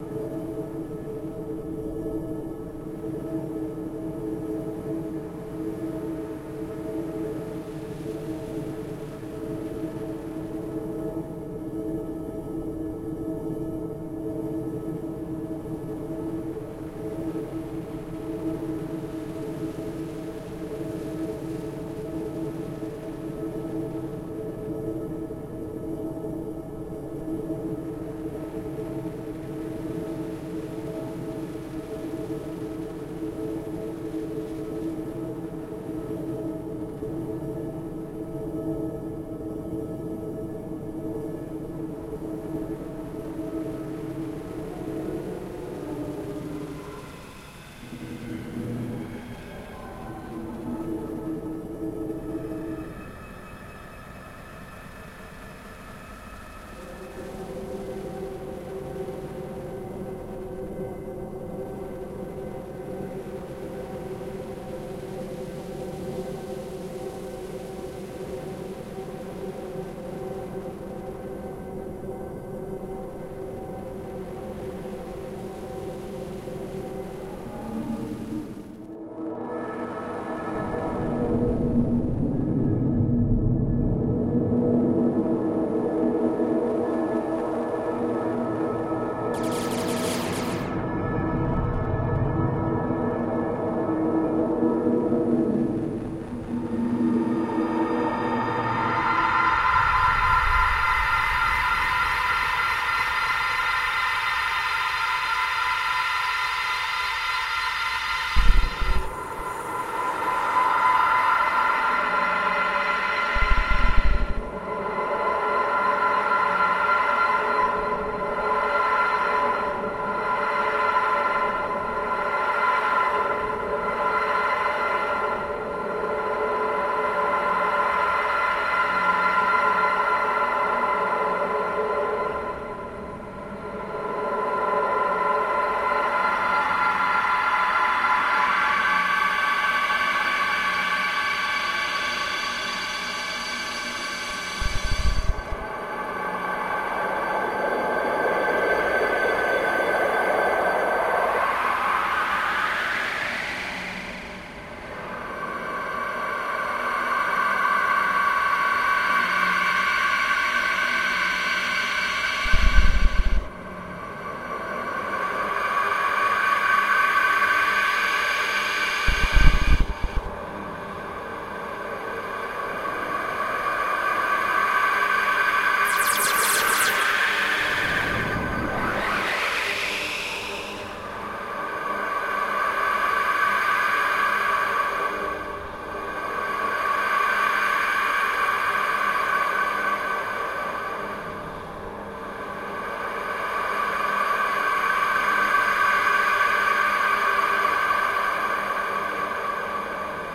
Sound squeezed, stretched and granulated into abstract shapes
granular, ambient